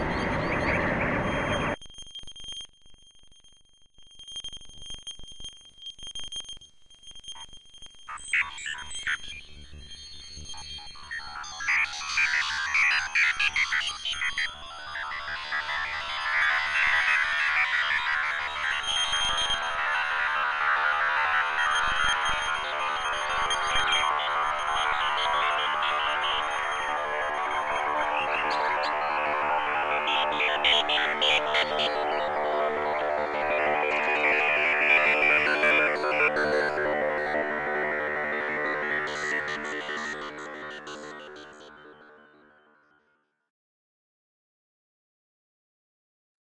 night in gale
remix of reinsamba's sweet nightingale...just some choice destructive fx and a light compression..
birdsong, processed, nightingale